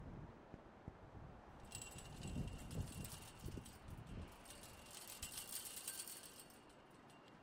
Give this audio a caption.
hand along chain fence
Hand running along a chain fence
fence, sound